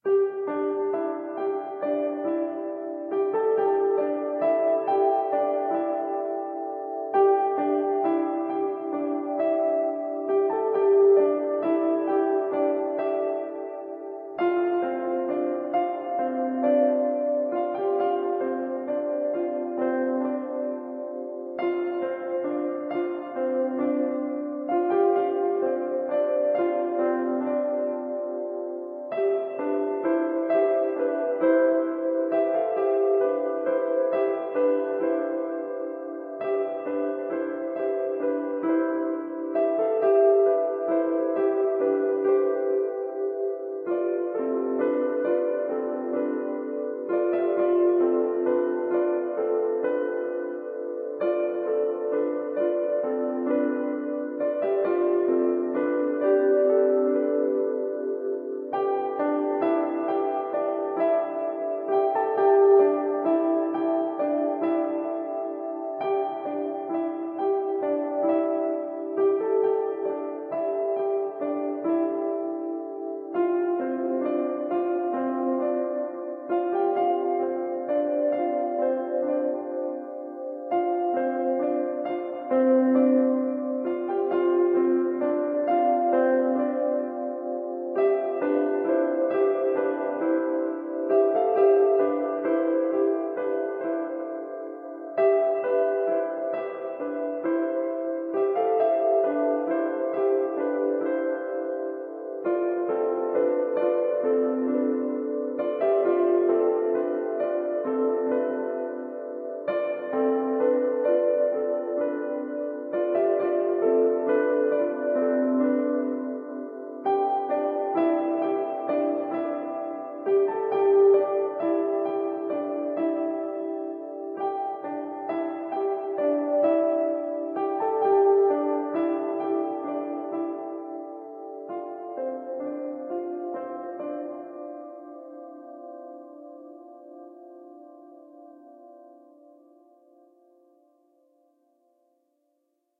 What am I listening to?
Long record-tapeish reverberated piano sequence repeated 2-point-sth times.
Piano & reverberation by my Casio synth. Tempo isn’t accurate.